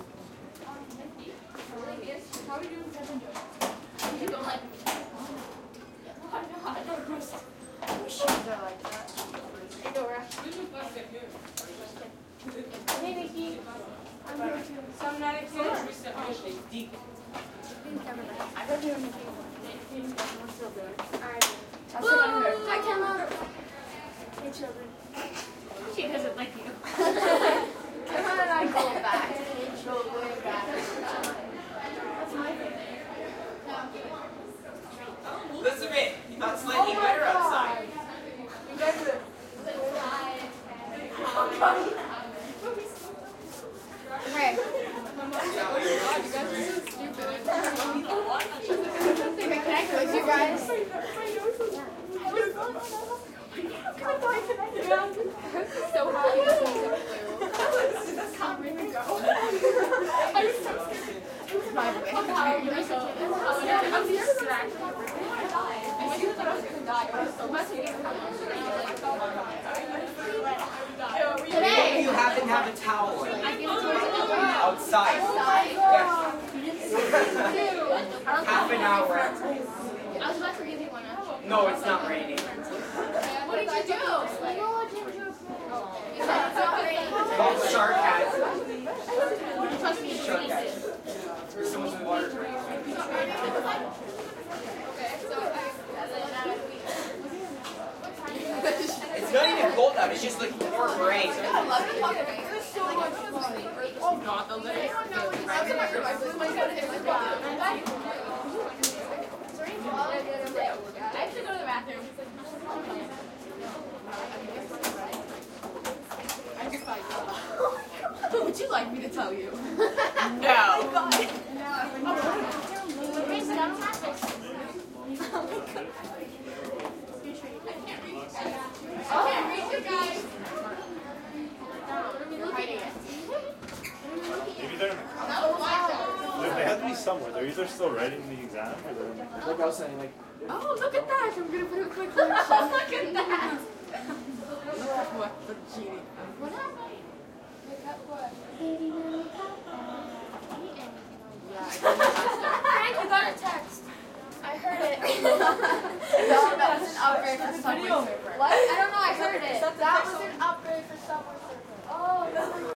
crowd int high school hallway light active